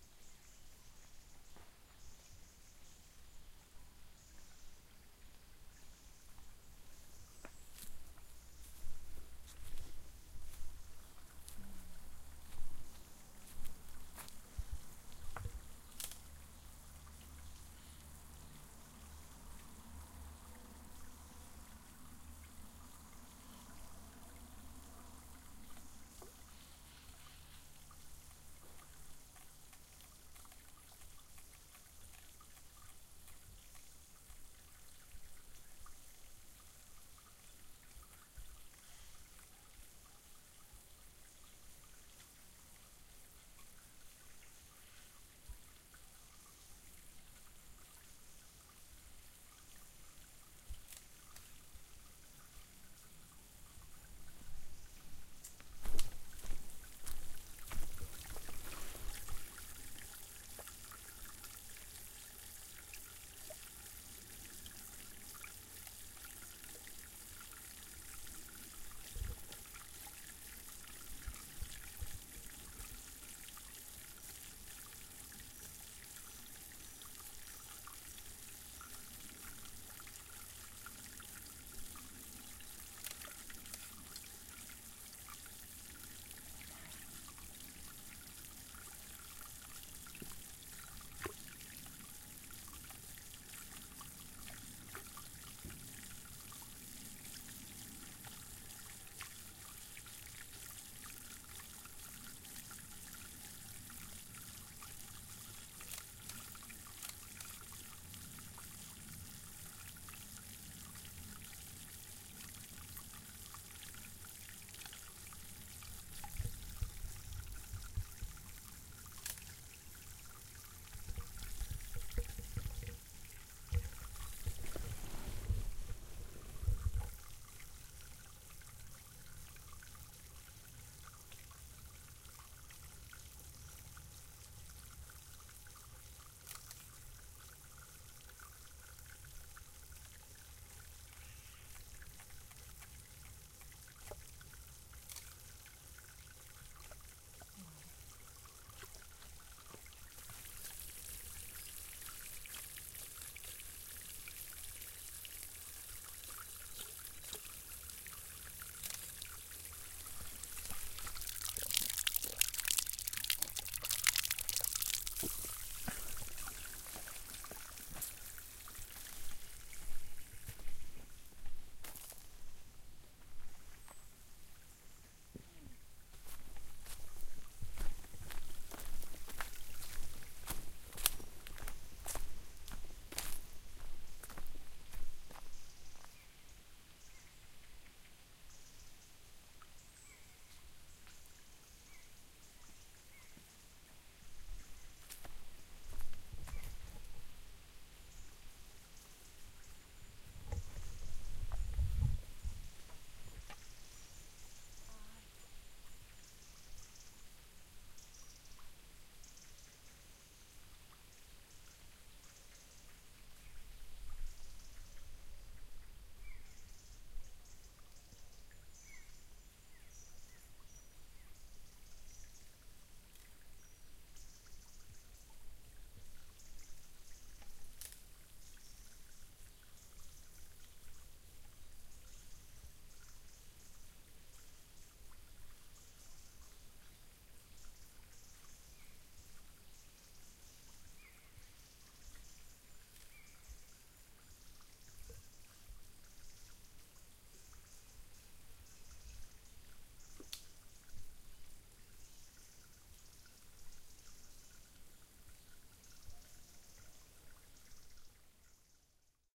Walk in Samalús Part 3
Field-recording of a small walk in Samalús (Catalunya, see the geotag).
The recording was made with a Zoom H4n.
ambience, birds, field-recording, nature, samalus, water